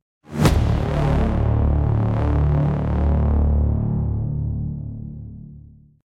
Big cinematic hit as requested by user werty12435.
Big, Huge, Effect, Hit, Dark, Impact, Sfx, Synth, Scifi, Cinematic